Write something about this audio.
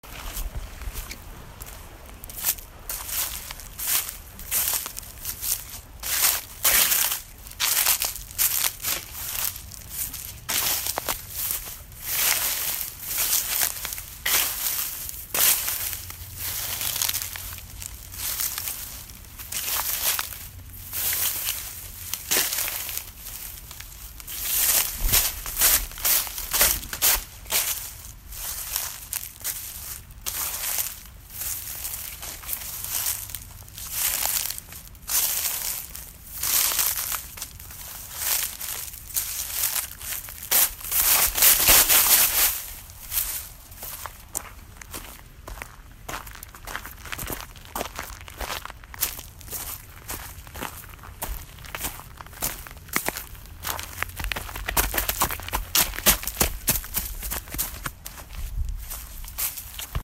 Hiking Through Tall Dry Grass & Gravel
Recorded on an Iphone SE. Walking/Running/Sneaking/Moving through a field of tall, dry/dead grass in the fall. Crunching leaves, & gravel footsteps are also heard.
Thank you for using my sound for your project.